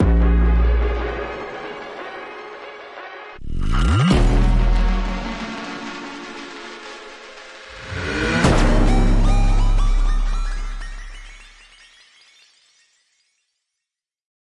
Three large sonic impacts, each with its own aftershock.
Created using sampling, FM synthesis, and granular synthesis.
experimental, impact